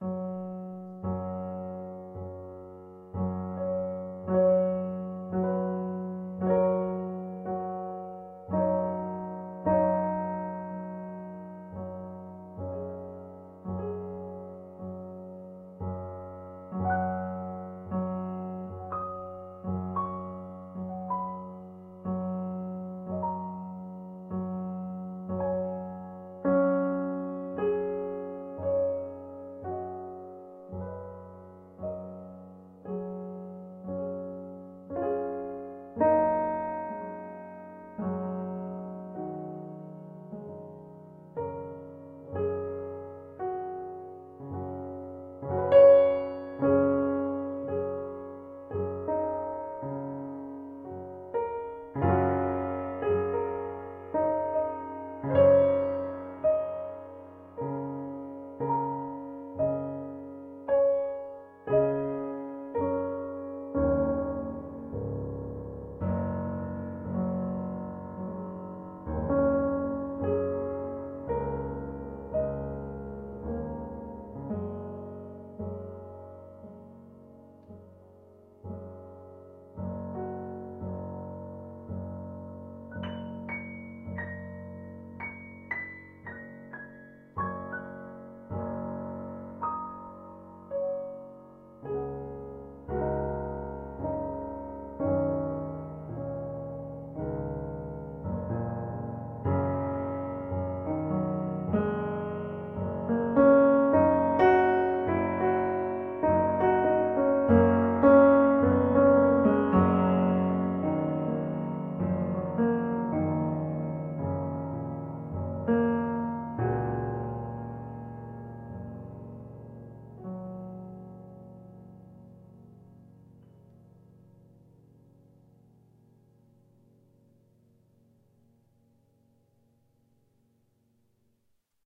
Melodic piano released as part of an EP.
melodic, piano